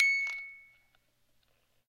MUSIC BOX C# 2
14th In chromatic order.
chimes, music-box